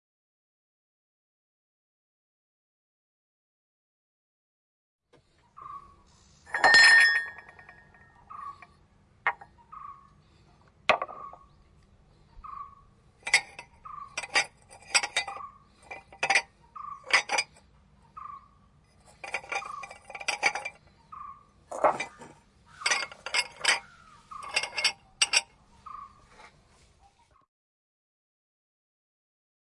Cups in the sink.
cups, kitchen-sink